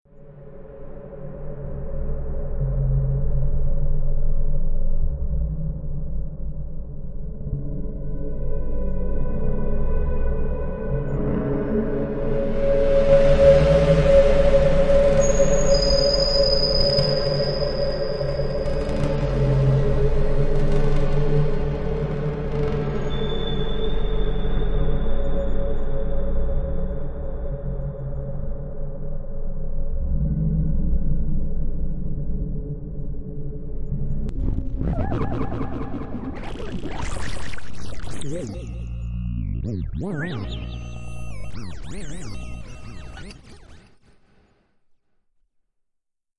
Mellow industrial atmosphere